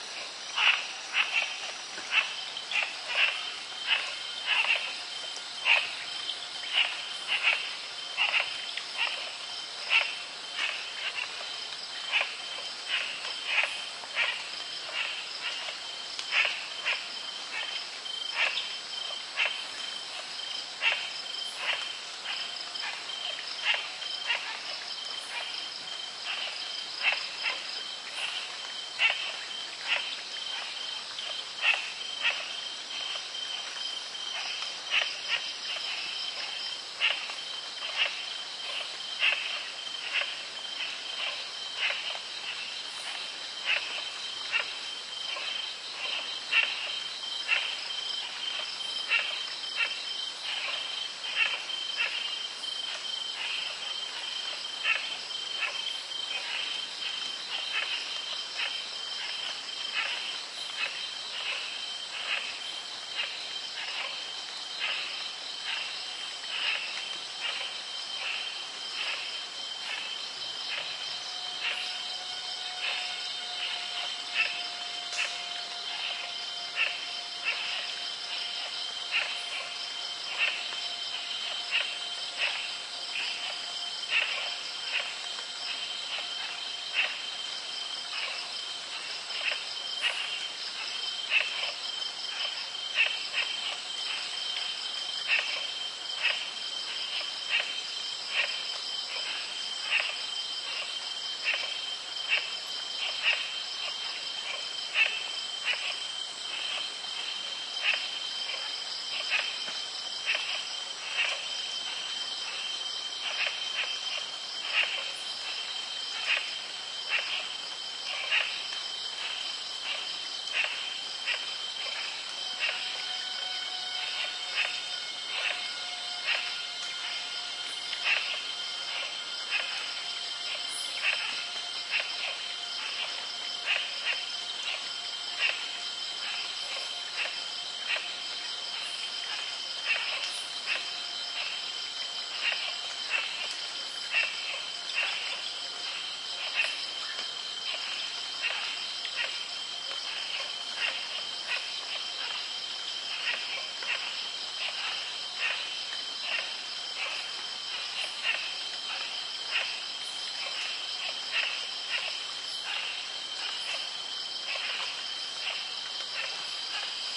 Thailand jungle night creek light crickets and bird chirps squawks
birds, creek, crickets, field-recording, jungle, night, Thailand